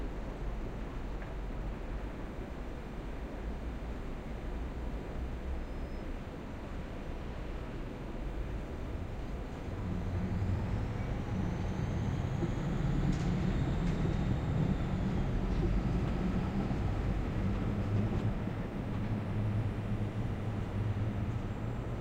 SKYLINE Jecklin disk 01
Recording from top floor clarion hotel oslo. Recording from the terrace suite of the hotel and i have been useing two omni rode mikrofones on a jecklin disk. To this recording there is a similar recording in ms, useing bothe will creating a nice atmospher for surround ms in front and jecklin in rear.